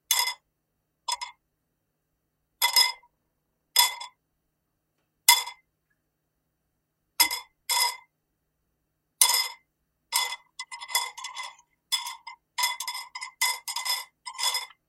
forks in a bowl in a sink
Sound of a fork in a bowl, though it was interesting. Recorded with a Sony IC Recorder and processed in FL Studio's Edison sound editor.
bowl, dishes, fork, sony-ic-recorder